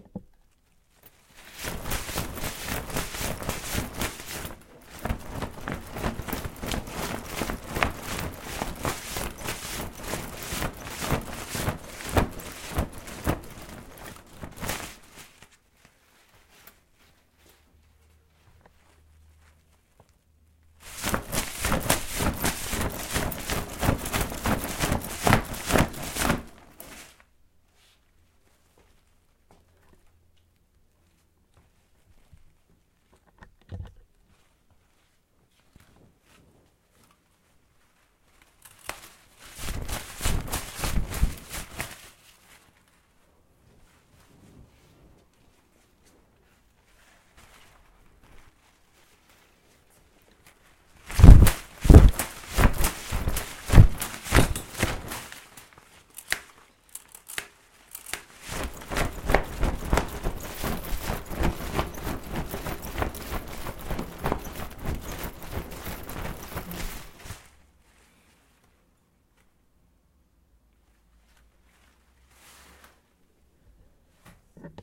110818-001 paraguas aleteo
Opening and closing an umbrella simulating the sound of bird wings, or a winged flying animal if you like!
Recorded with a Zoom H4-N
Abriendo y cerrando un paraguas para simular el sonido de un ave batiendo las alas.
Grabado con una Zoom H4-N